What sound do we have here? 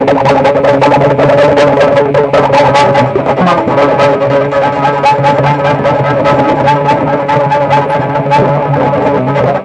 Scratchy Guitar Sample
scratching the string of my guitar for a project